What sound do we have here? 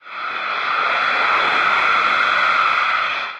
Space magic transition made by processing own shuffle recording.
Plaintext:
HTML:
horror, magic, scary, sliding, space, transition